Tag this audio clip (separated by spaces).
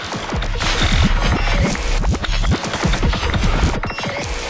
from Sounds